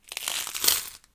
Coins sliding around in a plastic tub.
Coins, Jangle, Money